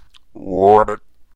Frog Ribbit
A prototypical frog sound, created by modifying a human voice.